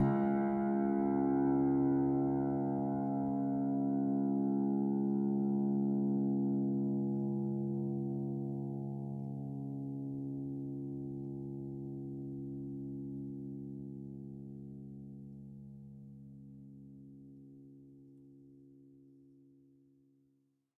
a multisample pack of piano strings played with a finger
piano, fingered, strings, multi